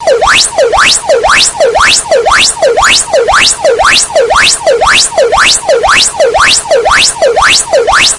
Another nice alien alarm.